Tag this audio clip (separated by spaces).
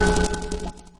electronic percussion stab